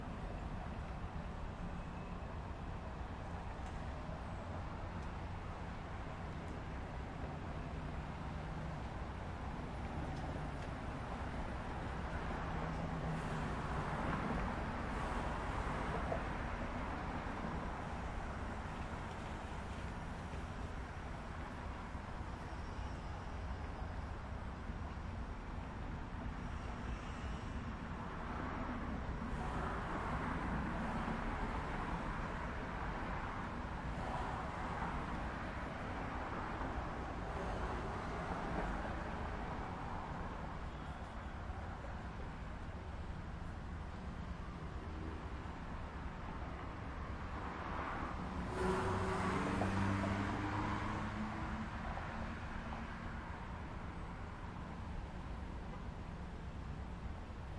Day Traffic - City Life
Street traffic and city life as heard from a balcony in Burbank CA (Greater Los Angeles).
Recorded outside with a Zoom H5 using the stereo microphones that come with it.
I would still appreciate it if I could see/hear the project this sound file was used in, but it is not required.
city-life, field-recording, street, traffic, urban